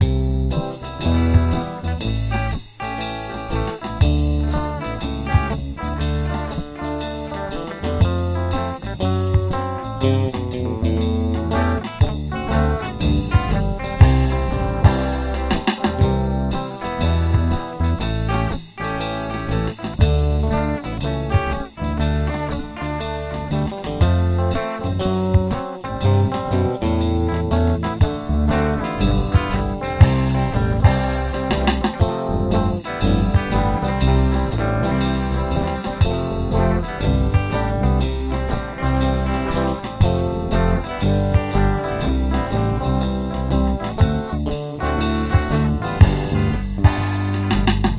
I Created this perfect seamless loop using a Zoom R8 portable studio. I played all the Instruments. It's got a cool jazzy feel to it.